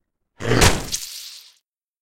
A monster or large animal biting down into flesh